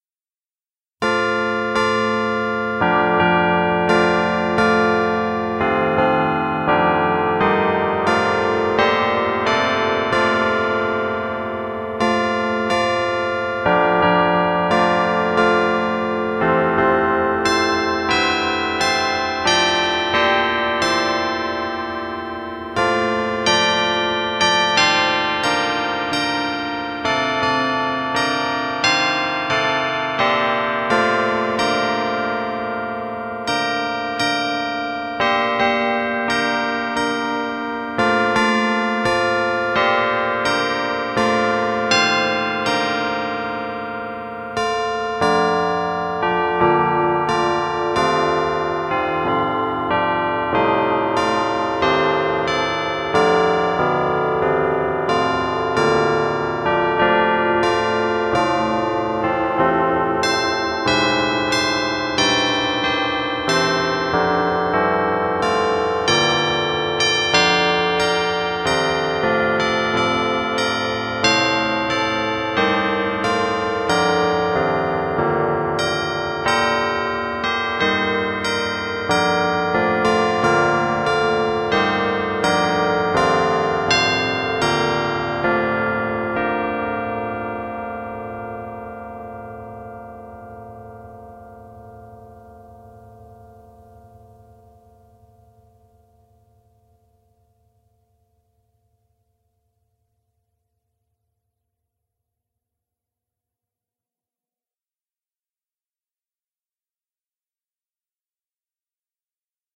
America The Beautiful (Maas-Rowe Digital Carillon Player)
Here is the final Maas-Rowe DCP Song. America The Beautiful. This song is pretty good as well, and sounds beautiful on these chimes. I hope you've enjoyed these beautiful church bell songs. Oh, and stay tuned in December, because I have one more song from the DCP that I will be uploading. It's a Christmas song, so I want to wait until December to upload it. So be sure to follow me and check back for when I post it. Hope you guys are doing well, and I'll talk to you all soon. Bye :)
Carillon-Chimes, Church-Bells